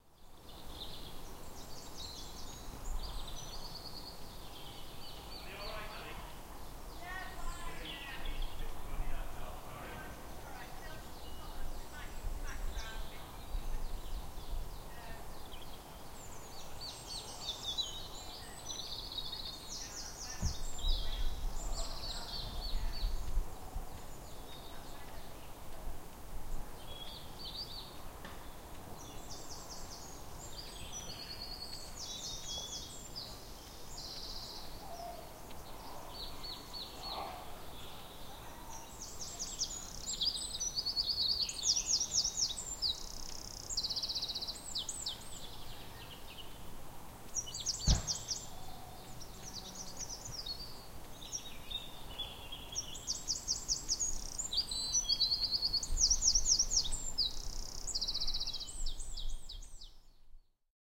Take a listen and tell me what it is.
Sk310308 woodland voices
The sounds of a spring day at Skipwith Common, Yorkshire, England. Many birds can be heard as well as the sound of walkers and their dogs.